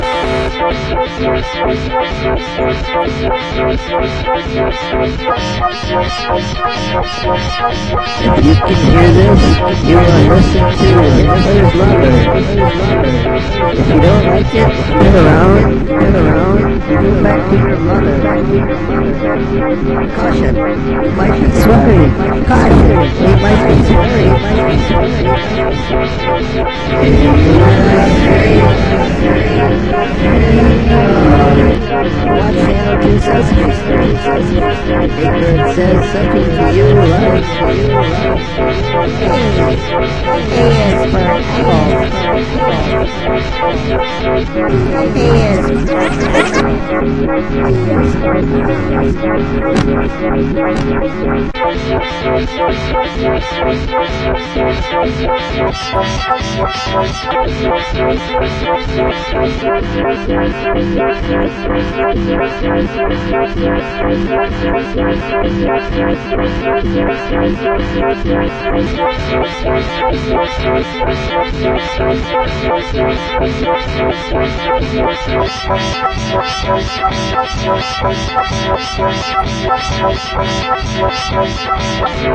its mufo in a efects chamber